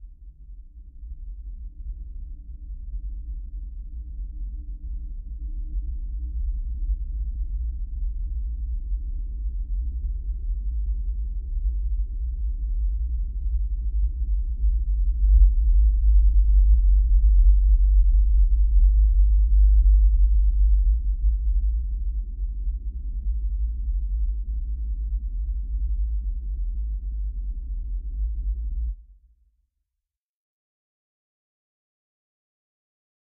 Deep Bass For A Depressing Video
This is a bass I have created out of my deep breath
bass, breath, deep, ambient, low, dark